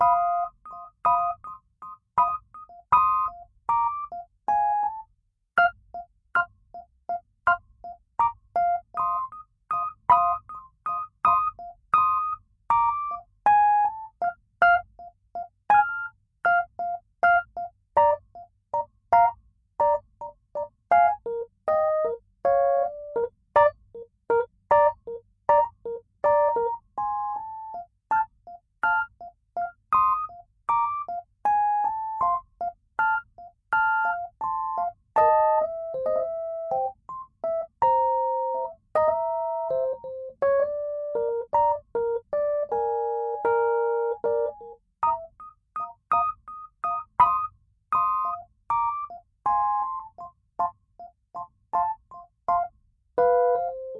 Song7 RHODES Fa 3:4 80bpms
80, beat, blues, bpm, Chord, Fa, HearHear, loop, Rhodes, rythm